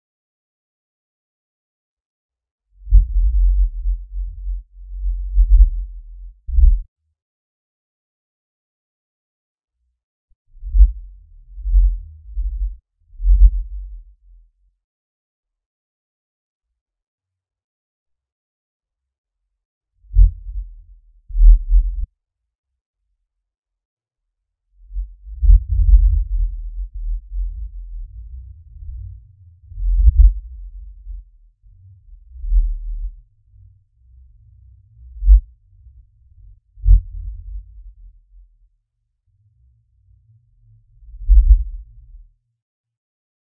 Filtered & Amplified - 21432 daveincamas May 18 1980 Mt. St. Helens Eruption From 140 Miles Away
This is a filtered and heavily amplified version of daveincamas "Mt. St. Helens Eruption From 140 Miles Away" recording. I examined the sound in a spectral analyser and filtered all the sounds that were not related to the low-frequency booms.
volcano, explosion, field-recording, eruption, mt-st-helens, remix